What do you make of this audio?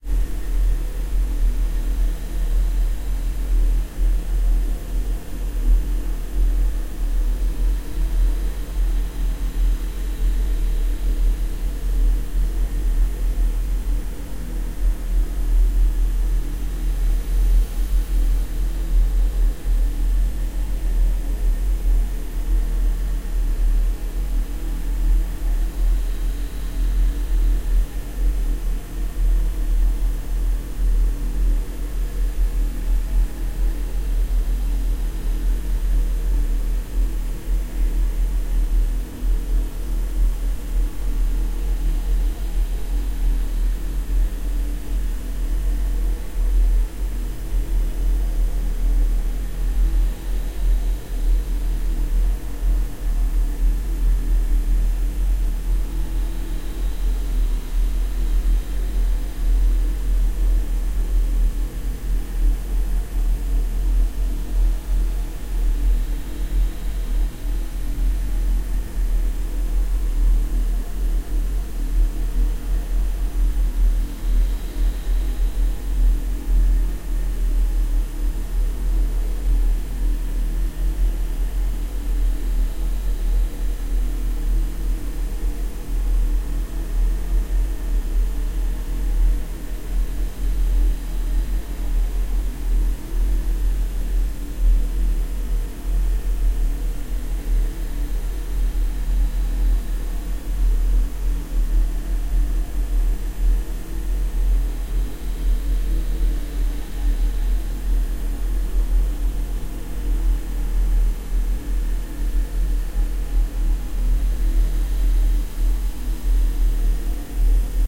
Basically, Creepy Ambience Background without the high pitched noises. I thought it was still pretty spooky.
atmosphere, creepy, scary, tense